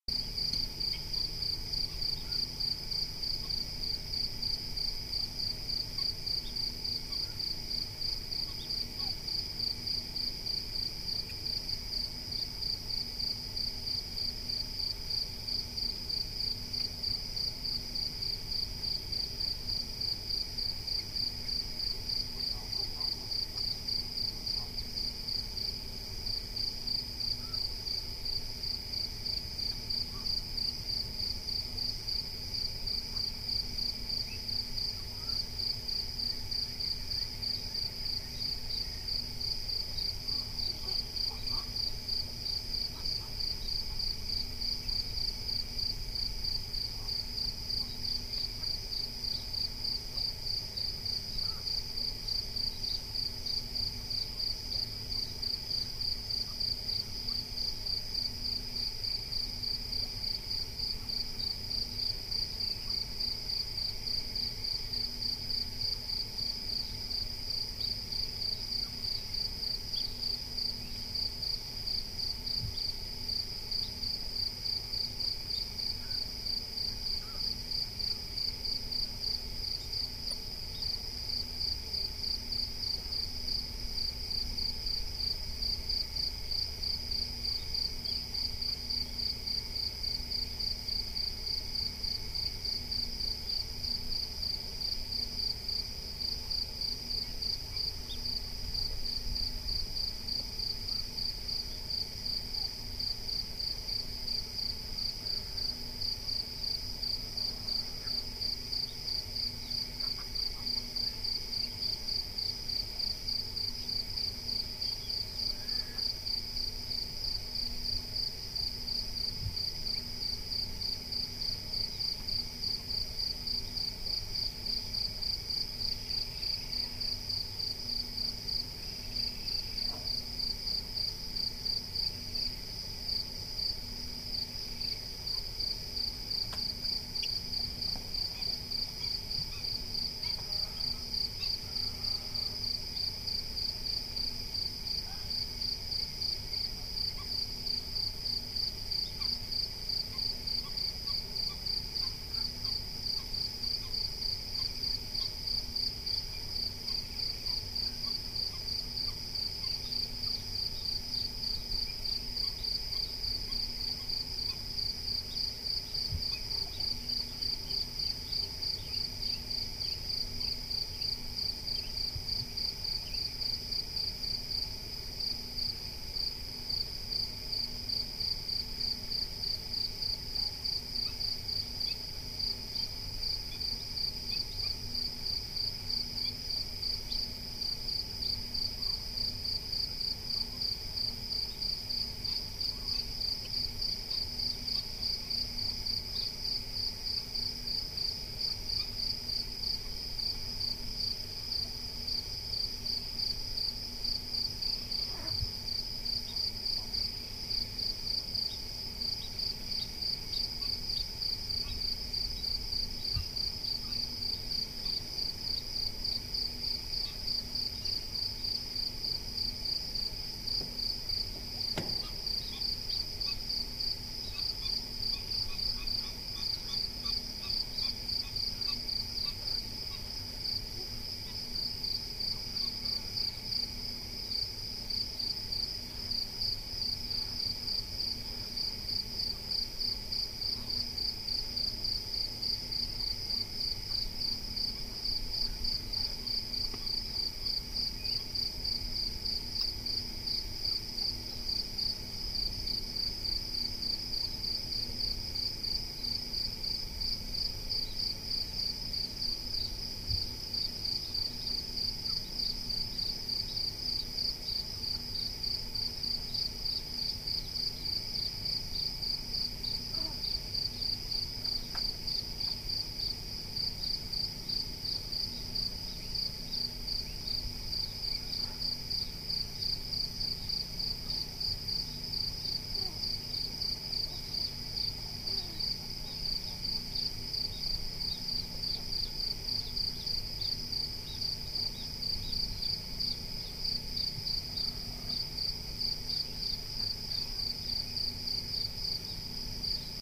Night ambiance near a building in the Donana marshes. Mostly crickets singing and soft calls from birds every now and then. Mic was a Sennheiser ME62 on a K6 system. Please NOTE: this is a MONO recording